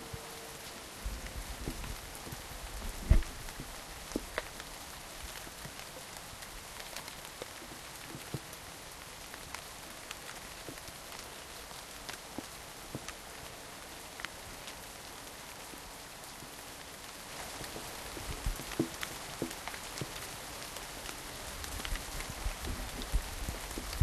Rain In An English Garden
Rainfall in an English Garden in the spring
April, garden, rainfall, showers, water, weather, wet